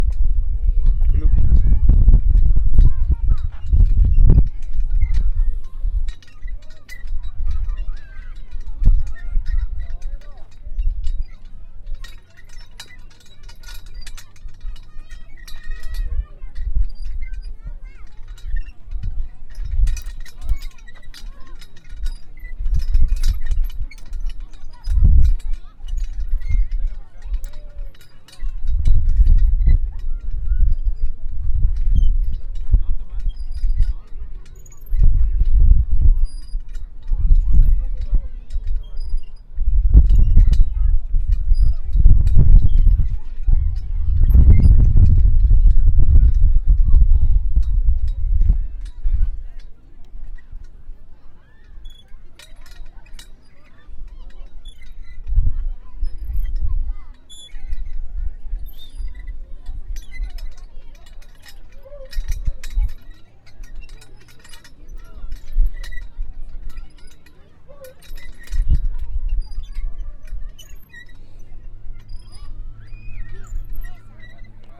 Sonido de columpios en movimiento
columpios, playground, swings